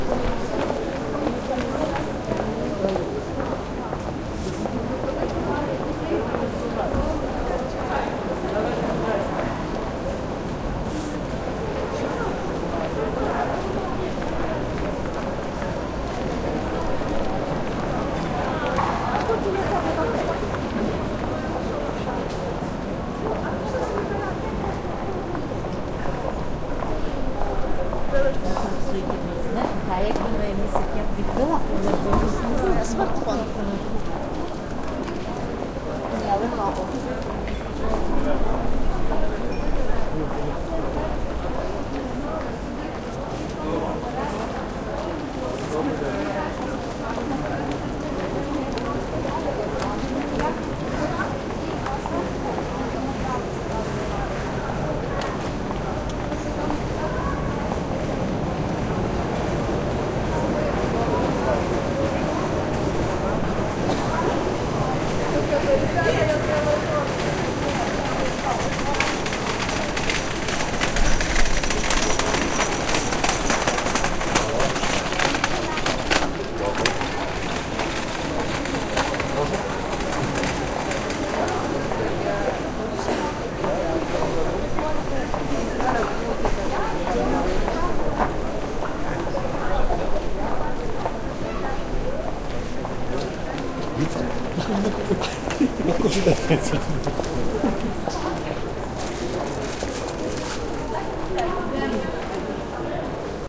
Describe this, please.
People on the street - downtown area
ambience, ambient, atmosphere, center, city, downtown, field-recording, Galitska, humans, Lviv, many, noise, outdoor, passerby, passers, passersby, pedestrian, pedestrianized, pedestrians, people, soundscape, street, summer, traffic, Ukraine